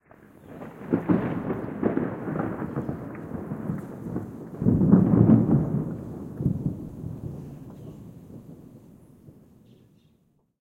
Thunder sound effect 1
Thunder sound recorded with Tascam DR 07 and remastered with Adobe Audition
drip, neighbourhood, strike, weather, nature, water, rolling-thunder, suburb, explosion, lightning, thunder-storm, rumble, thunderstorm, storm, thunder, rain, ambient, field-recording, wind, shower